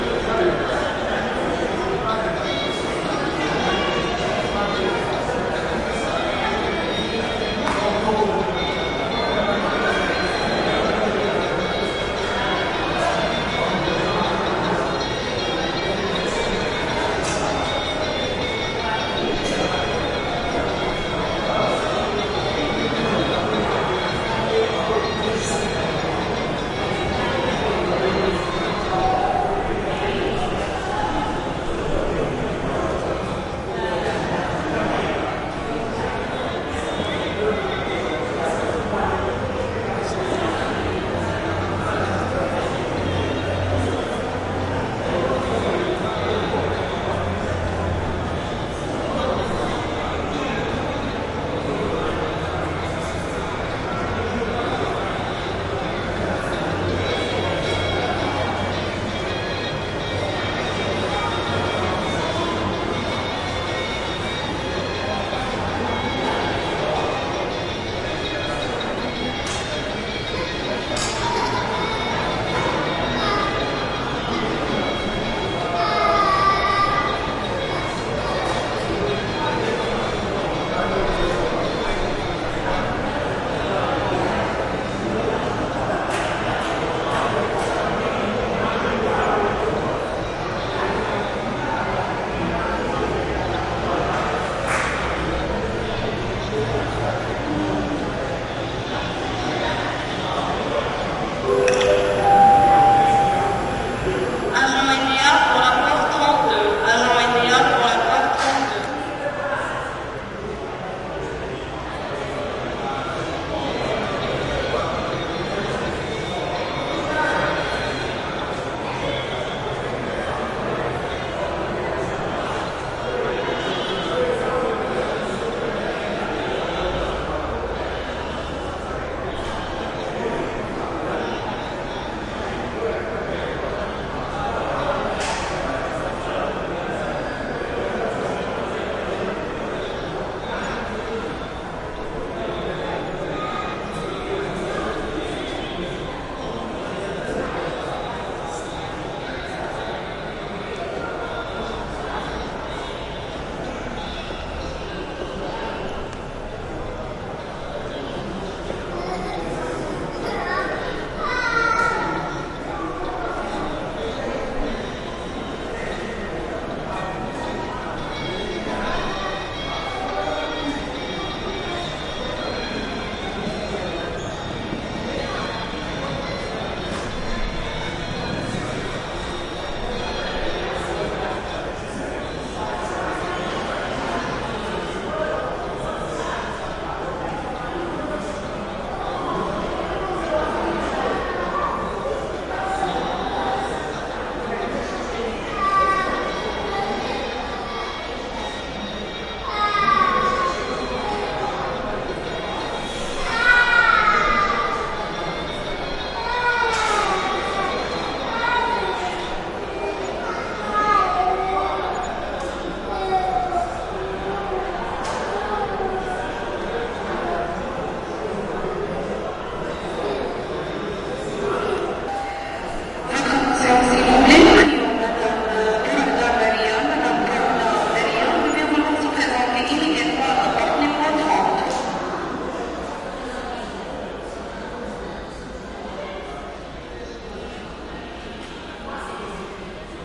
airport Casablanca waiting area at gate busy activity echo +PA Morocco, Africa
Africa, busy, area, airport, gate, waiting, Morocco, Casablanca